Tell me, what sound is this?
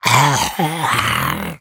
A creepy goblin voice sound to be used in fantasy games. Useful for all kinds of small dim witted creatures that deserves to be slain.